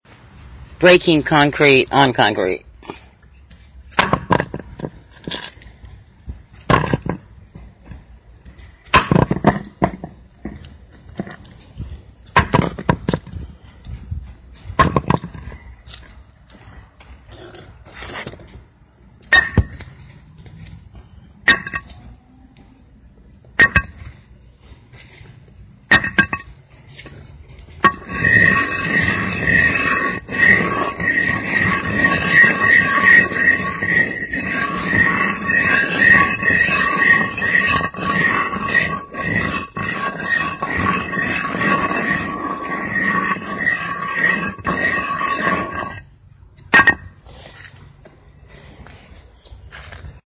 Breaking concrete
This sound was a foley created sound for video game sound design class. I crushed a concrete block with a rubber mallet.
block, blocks, bricks, building, cement, concrete, construction, construction-site, crunch, crush, demolition, experimental, noise, rumble